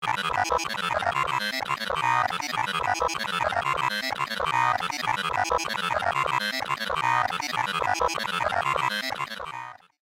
Robo Garble 1
A long robotic garble that can be cut down to whatever length you need
glitch, science-fiction, abstract, weird, future, digital, machine, robot, noise, robotics, computer, strange, artificial, electromechanics, electronic, electronics, electric, sci-fi, mechanical